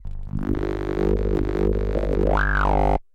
sherman cable57
I did some jamming with my Sherman Filterbank 2 an a loose cable, witch i touched. It gave a very special bass sound, sometimes sweeps, percussive and very strange plops an plucks...
dc, electro, sherman, filter, phat, touch, cable, current, analog, analouge, noise, ac, fat, filterbank